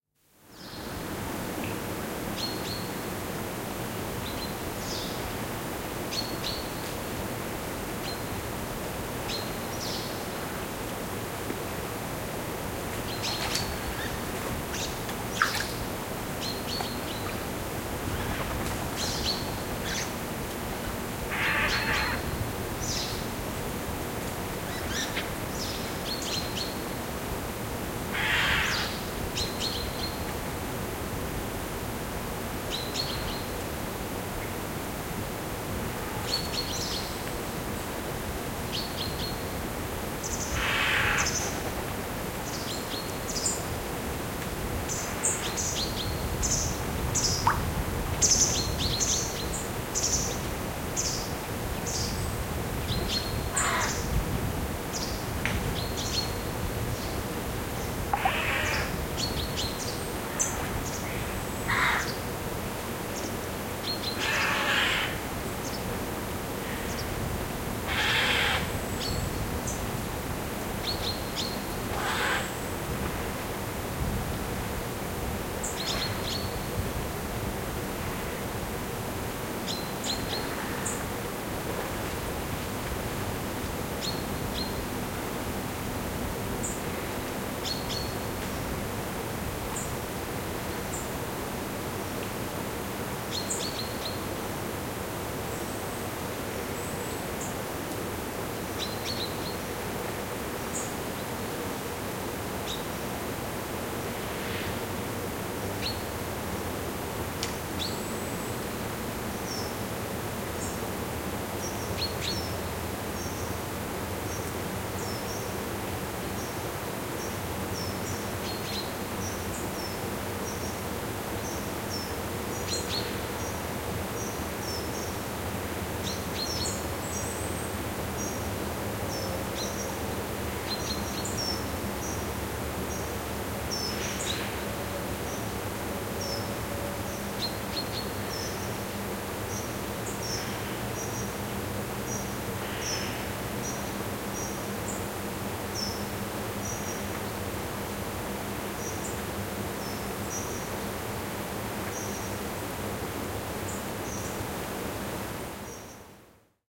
Metsä syksyllä lammen rannalla / Forest in the autumn near a pond, hum, small birds tweeting, a jay calling, drops falling to the water
Metsän kohinaa, pikkulinnut ääntelevät, närhi huutelee, pisarat putoilevat veteen.
Paikka/Place: Suomi / Finland / Pusula
Aika/Date: 09.09.1981
Tweet, Field-recording, Forest, Birds, Syksy, Autumn, Humina, Suomi, Water, Finland, Tehosteet, Vesi, Humming, Yle, Yleisradio, Drops, Pisarat, Finnish-Broadcasting-Company, Jay, Kohina, Soundfx, Linnut